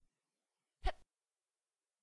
A childlike character making a "hup!" sound as she jumps.